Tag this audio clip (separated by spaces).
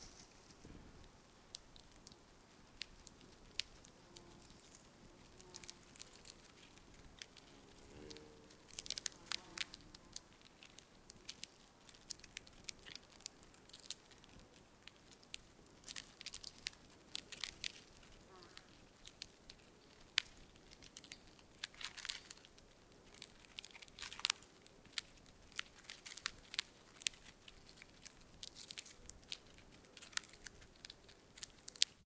Crackling Eating Wasp